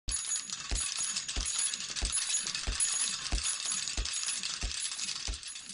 El sonido es de bicicleta pequeña
bicycle, bicicleta